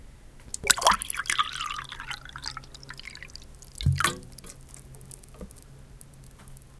pouring water from a plastic vase to a glass. recorded with a minidisc, stereo electret mic and portable preamp.
pour, competition, environmental-sounds-research, water, glass